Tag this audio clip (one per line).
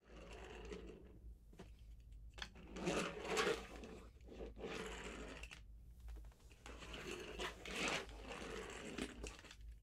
car
rolling
playing